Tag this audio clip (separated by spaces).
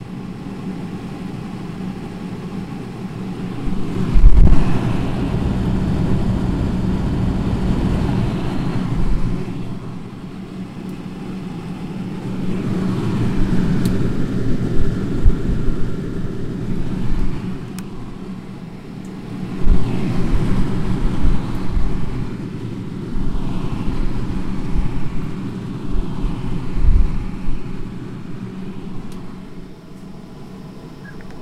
abstract cold freezer